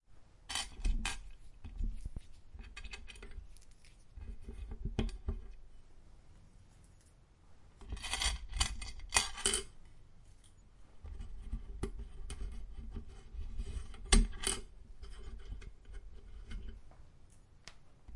Moving and dropping metal screws on a small glass-top table.
Recorded with a Zoom H1.
glass-table, metal-pieces, screws